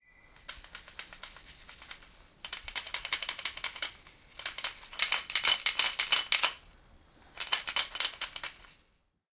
The recording you will hear children's-rattle.